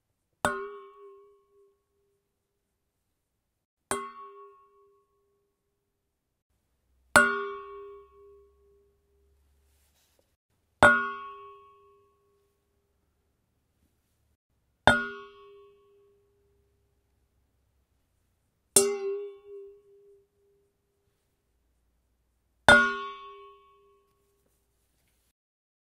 cuenco metal
metal, water, liquido, cuenco, liquid, bowl, close-up, agua